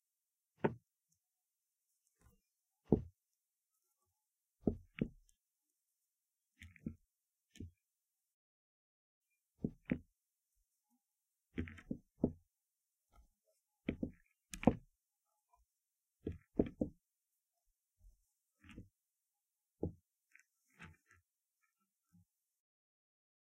Pool Table setting up pooltable balls
Setting up the pool table balls in a triangle form
Pool, OWI, Table, Setup